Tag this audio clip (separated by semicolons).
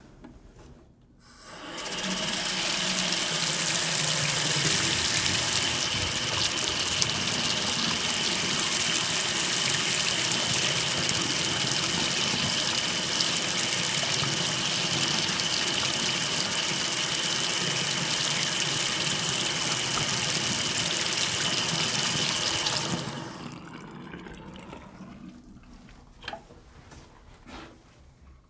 water; sink; Bathroom; tap